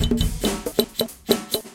Simple Fast Beat
beat, fast, loop, music, repeat, simple